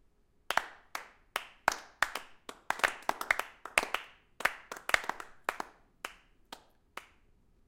weak clapping
Some lackluster applause from a bored unimpressed audience (approx 6) - recorded in Dallas for a theatre piece
applause, boring, lackluster, meh